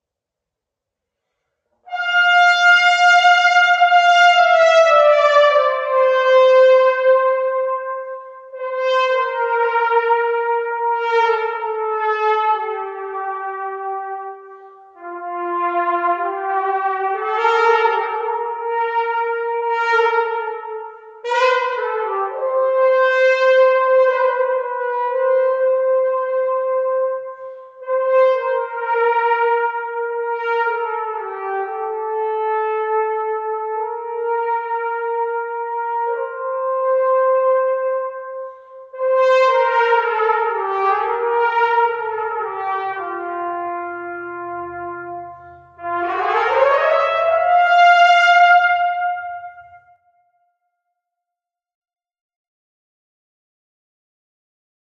Cornet in Large Church
This is my new rotary-valved cornet with German valves and a 1913 Holton bell all plated in gold and white rhodium. The sample was recorded in a large church in North Carolina. With the help of Bill Jones I designed this horn and Dr. Jones built it. It starts loud at a forte but then plays some quieter phrases around a mezzo piano.